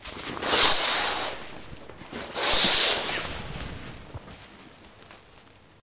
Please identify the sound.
Curtains Opening or Closing 2
I'm opening or closing curtains on a rail. Recorded with Edirol R-1 & Sennheiser ME66.
closed, opening, closes, opened, curtains, opens, closing, close, curtain, open